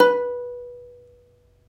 Notes from ukulele recorded in the shower close-miked with Sony-PCMD50. See my other sample packs for the room-mic version. The intention is to mix and match the two as you see fit.
These files are left raw and real. Watch out for a resonance around 300-330hz.